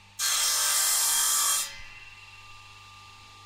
circ saw-02
Distant circular saw sound.
circular-saw, electric-tool, saw